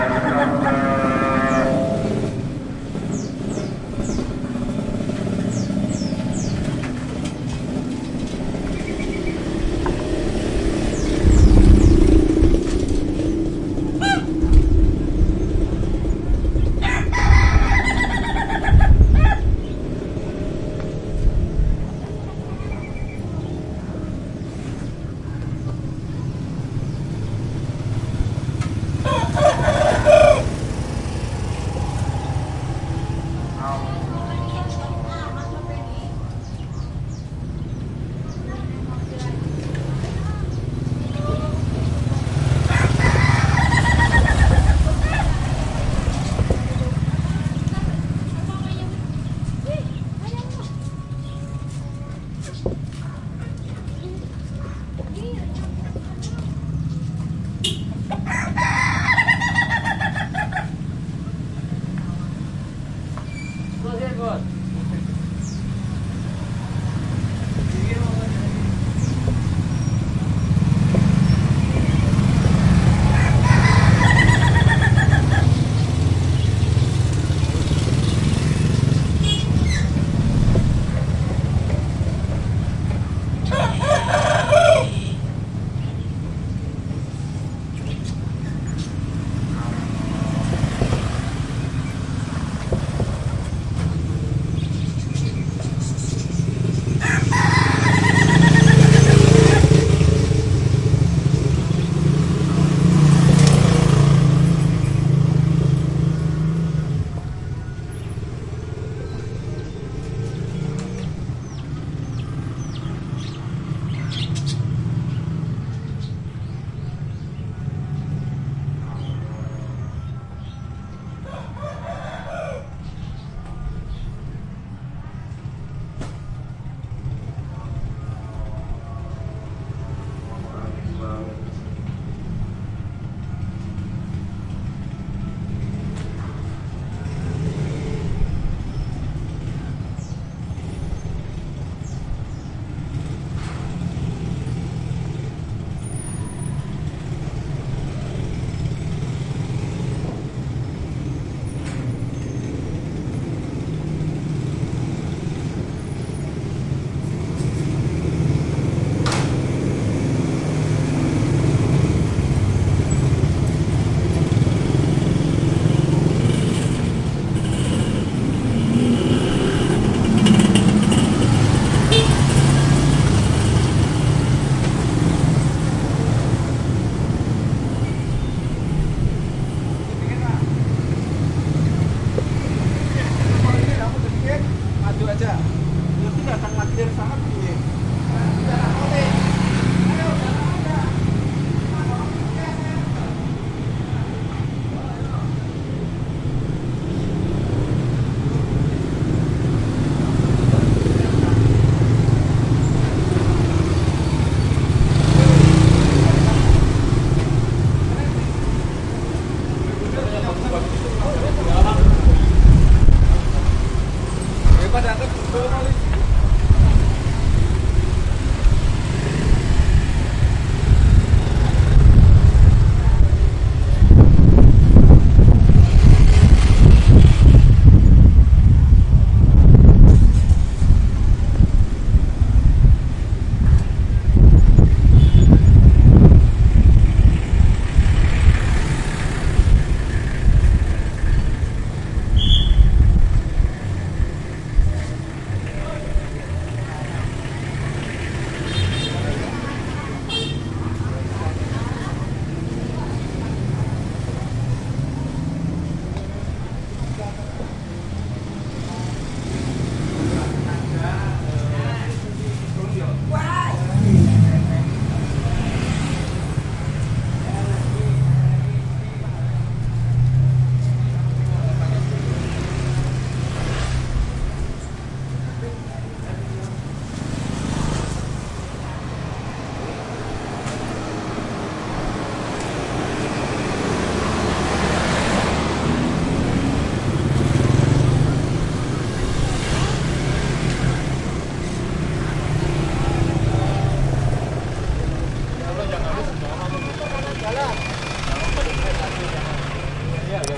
Walking through a town in central java. Recording onto the H2...